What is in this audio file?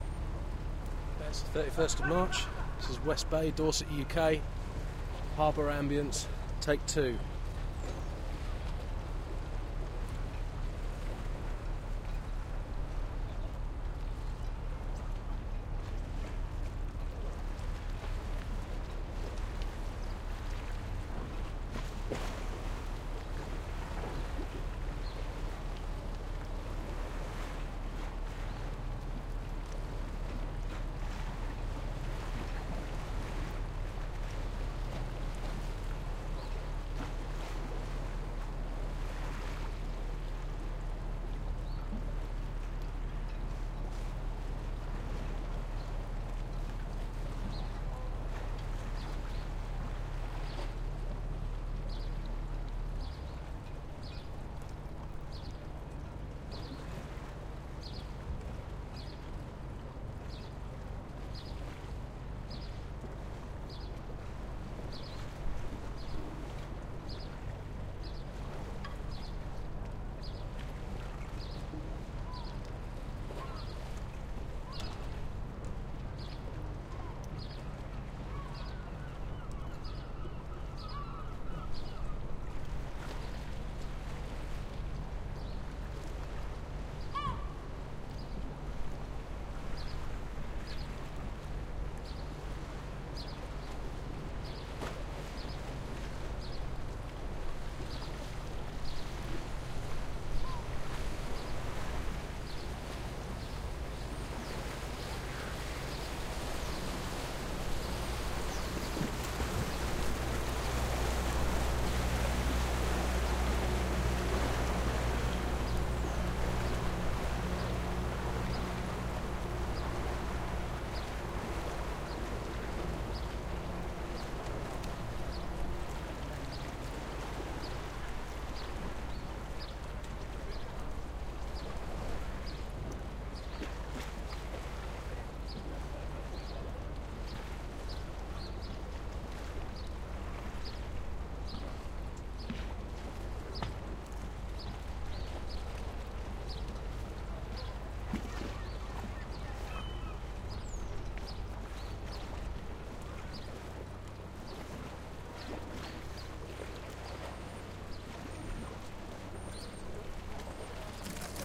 CFX-20130331-UK-DorsetHarbour02
Small Harbour Ambience